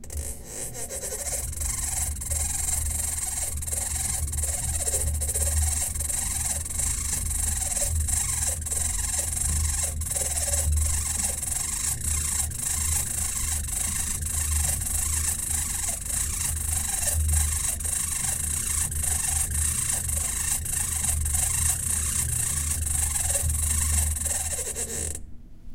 A recording of a broken elliptical exercise machine. Recorded with a Zoom H4 on 27 May 2013 in Neskowin, OR, USA.
machine, squeak, whir, elliptical, motion, hum, exercise, engine, accelerate, decelerate, motor